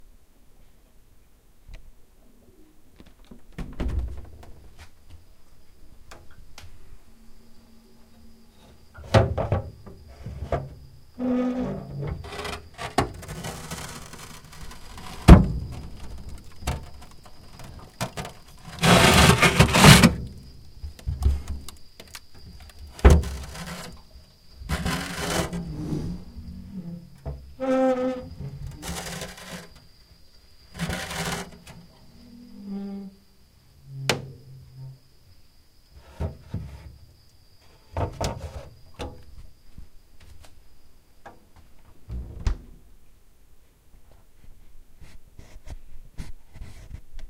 Old freezer was creaking and squeaking when opening and closing both the door and the drawers inside. While opening and closing the drawers a crunching sound was produced due to the frost that had formed inside. At the end the recorder, a Tascam DR-40 with narrow stereo field, was turned off.
After listening to the sound I which I had made much longer pauses between steps and unfortunately the summer house was only rented for a couple of weeks. This was recorded at night so the kids would not make noise.